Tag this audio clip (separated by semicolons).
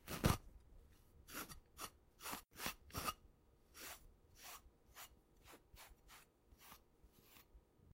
blade; butter; knife; metal; scrape; scraping; sharpen; sharpening; steel; toast